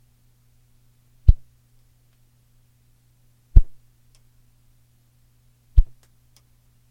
swiping a ruler up and down really fast